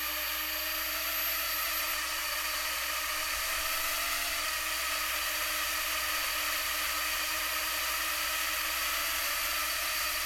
The sound of the four propellers on a small Hubsan drone. Sounds like a swarm of bees.
bees, drone, propeller